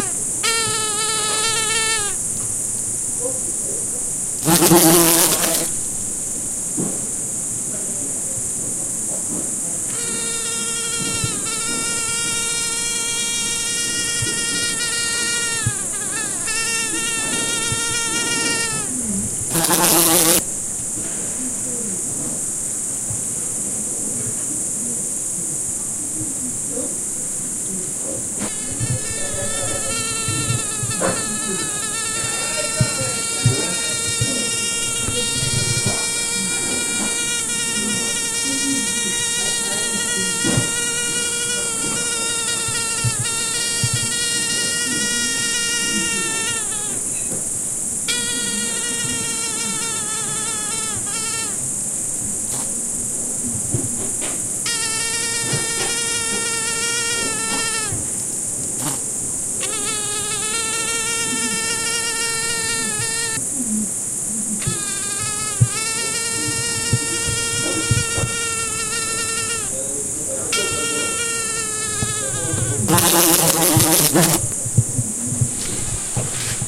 Mosquito and Fly
the sounds of a mosquito and flies on the window
fly, cheep, squeak